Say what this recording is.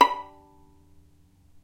violin pizz non vib B4
violin pizzicato "non vibrato"
non-vibrato, violin